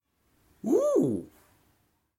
foley for my final assignment, a man intrigued